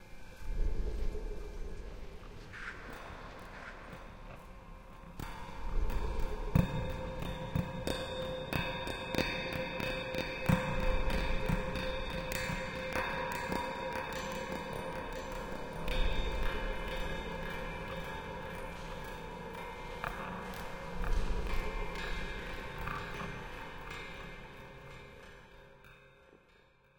DABEL Jérémy 2016 2017 monsterBehindYou
An evil monster calling someone accompanied by a stressful music of metallic noises.
I recorded the sound of someone knocking on a metallic surface, I added some reverb and echo. I also use the record of someone blowing like wind noise, I added some reverb and echo too and made it repeat five times. I also used the sound of someone whispering, I high pitched it to create a creepy voice with some reverb.
• Typologie (Cf. Pierre Schaeffer) : impulsions complexes (X’)
• Morphologie (Cf. Pierre Schaeffer) :
1. Masse : son cannelés
2. Timbre harmonique : criard
3. Grain : rugueux
4. Allure : sans vibrato
5. Dynamique : graduelle
6. Profil mélodique : variation serpentine
7. Profil de masse : site, différentes variations
nightmare, creepy, sinister, spectre, stressful, Halloween, mettalic, evil, haunted, thriller, horror, scary, fear